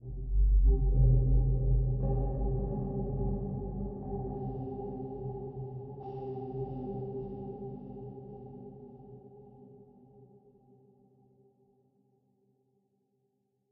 Result of a Tone2 Firebird session with several Reverbs.
ambient, atmosphere, dark, experimental, reverb